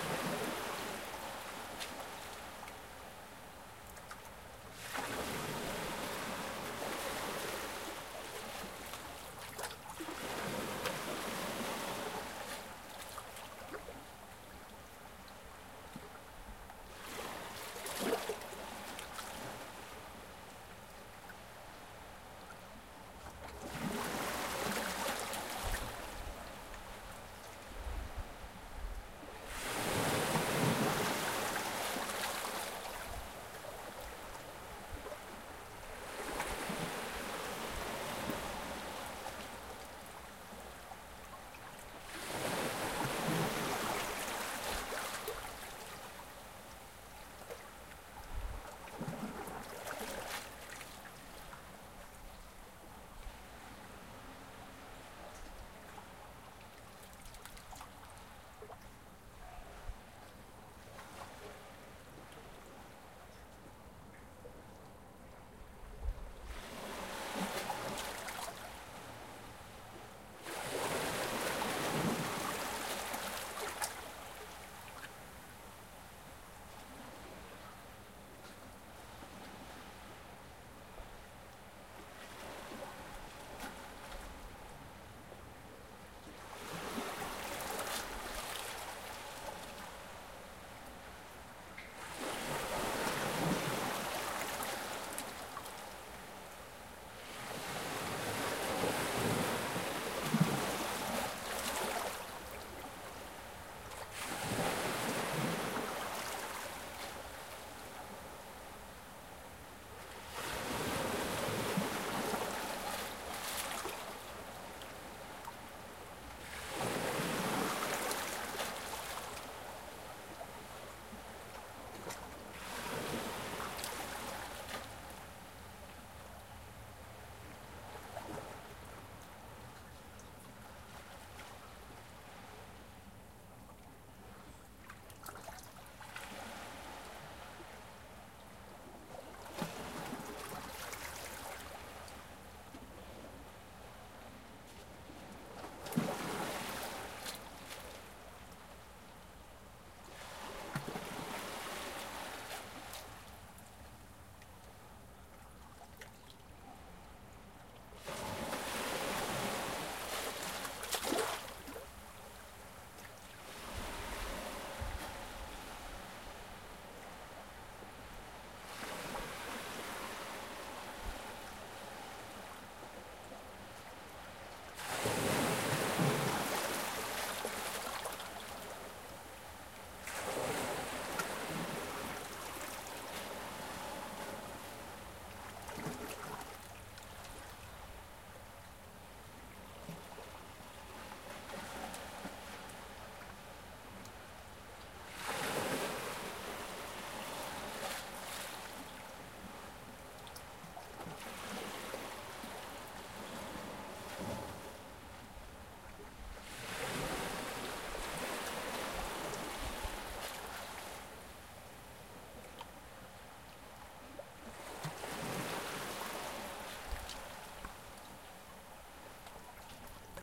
waves hit shore barcelona
Waves in the port of Barcelona
barcelona, port